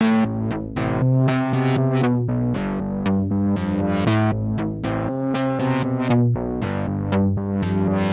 TS Racer 118bpm
Simple music loop for Hip Hop, House, Electronic music.
electronic, hip-hop, house-music, loop, music-loop, sound, synth-loop, trap, trap-music